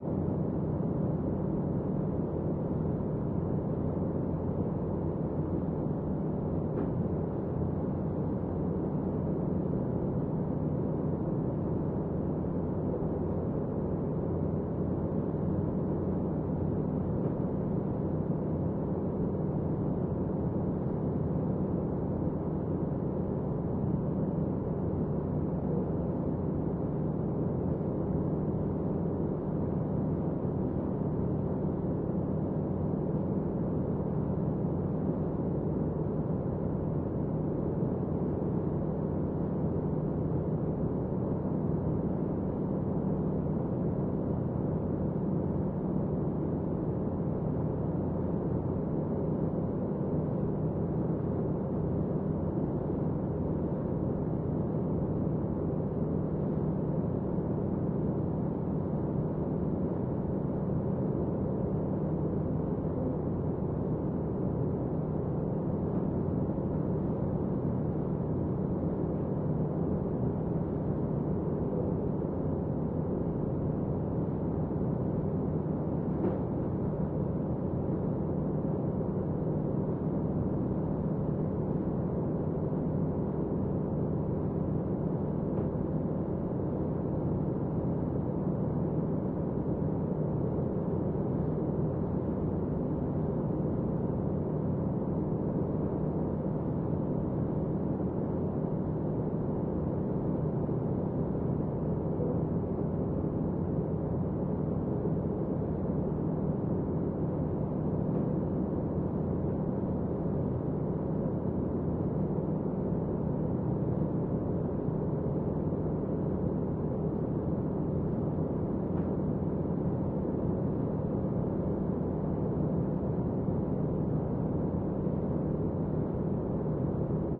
bow navy ambience
ambiance in the bow of a navy ship at the night, traveling at the sea